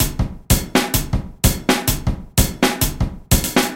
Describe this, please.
odd time beat 120bpm-04
odd time beat 120bpm